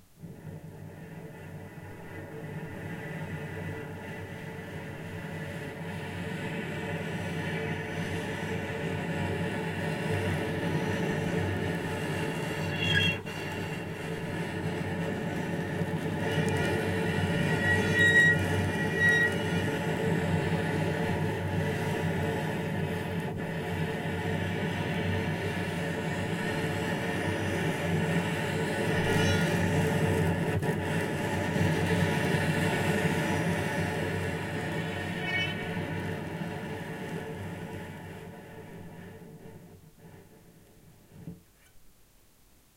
cello played with the bow on the bridge and with muted strings (by the left hand) / variations in bow pressure and partials of the strings (sul ponticello) / recorded at very close distance with Zoom H4N build in microphones
bow, bridge, cello